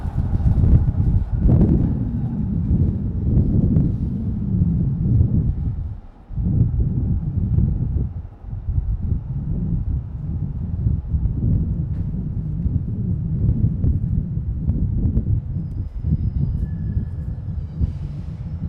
WIND BLOWING STEREO
Wind Blowing in the mic
creepy, field-recording, nature, wind, stereo, blowing, ambience